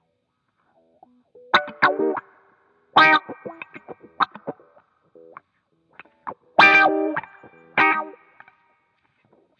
GTCC WH 01
bpm100, fm, guitar, samples, wah